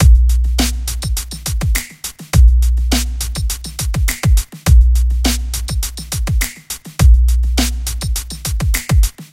103 boom 808 break
103, 103-bpm, 103bpm, 808, beat, boom, bpm, break, breakbeat, dance, drum, drum-loop, groovy, hard, kick, loop, percs, solid